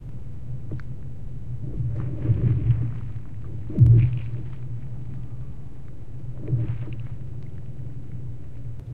Ice Fields Moving Rumbling
Laying in the darkness over the ice field under a cardboard box with the headphones and microphone. Enough far away from the shore to make me nervous when the rumble and cracks hit very near.
earthquake, movement, field-recording, shake, Ice, freeze, quake, collapse, moving, winter, rumbling